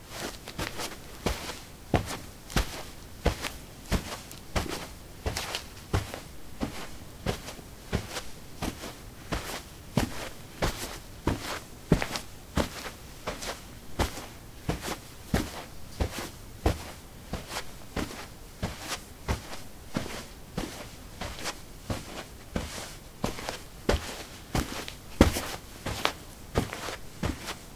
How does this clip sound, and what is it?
carpet 14a lightshoes walk
Walking on carpet: light shoes. Recorded with a ZOOM H2 in a basement of a house, normalized with Audacity.